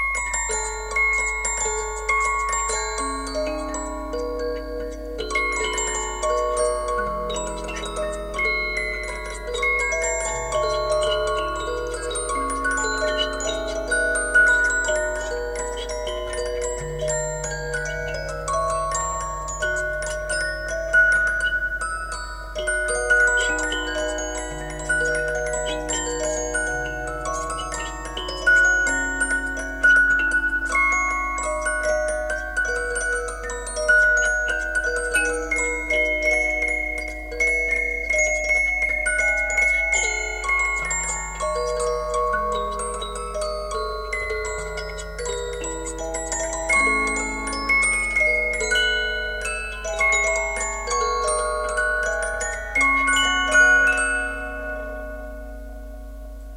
I have refurbished the 150 years old musuc box. Now a little bit richer sound, n'............ c'....... est...... pas,,,,zzzzzzzzzzzzzzz